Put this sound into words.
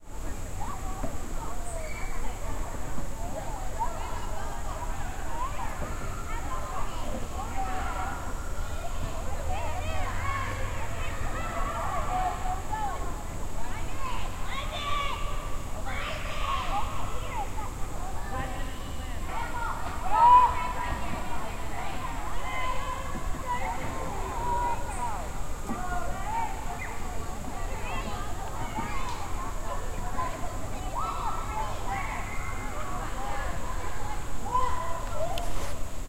Recorded across the pond from a summer day-camp.